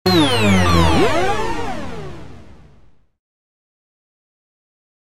Generic unspecific arftificial space sound effect that can be used in games for beaming something
beam, effect, game, jingle, space